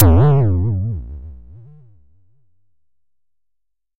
Tonic Weired Electronic Low Fequency Effect
This is a weird electronic low frequency sample. It was created using the electronic VST instrument Micro Tonic from Sonic Charge. Ideal for constructing electronic drumloops...
drum, electronic